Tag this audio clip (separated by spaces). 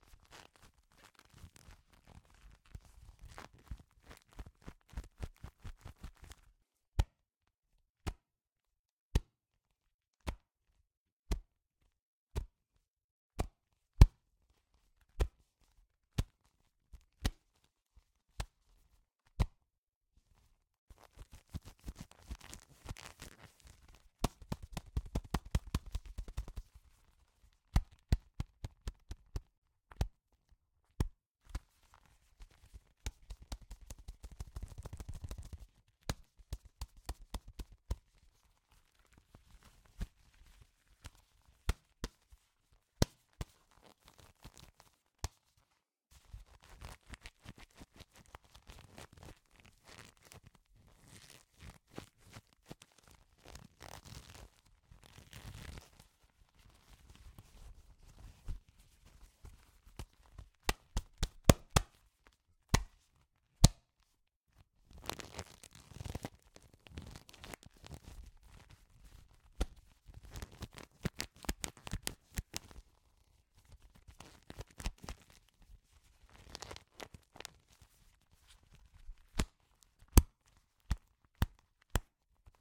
Foley handling-noise onesoundperday2018 wallet